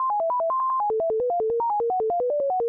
Beep&Bop
Electronic tone generated from the text "Texto de Prueba." with Matlab.
Beep, bop, effect